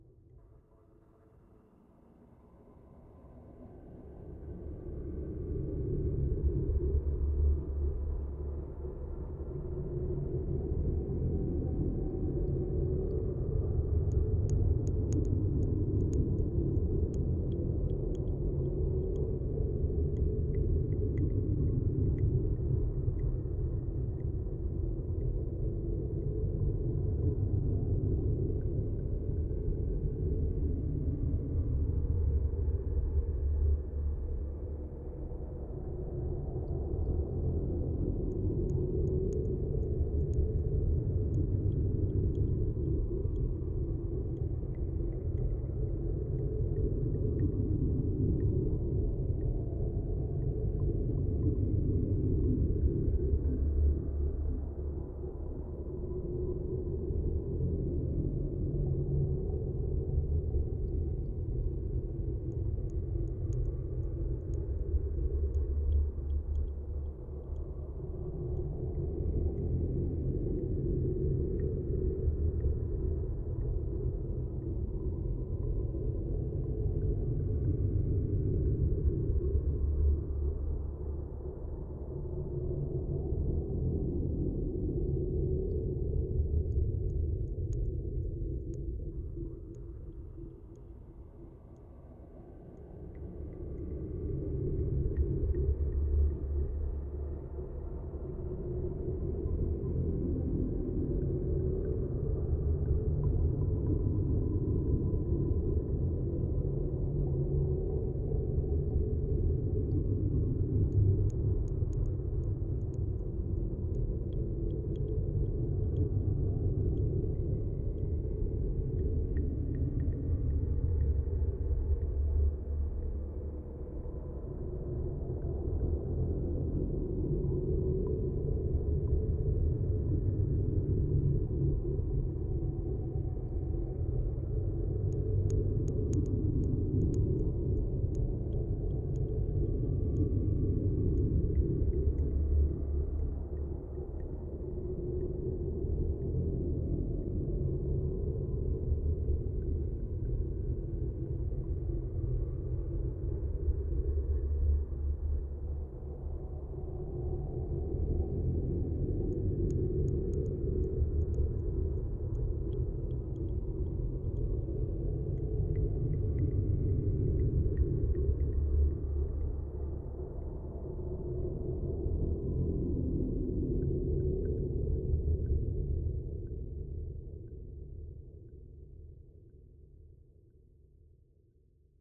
subwat out
Fx_Soundscapes from manipulating samples(recording with my Zoom H2)
fx, lfe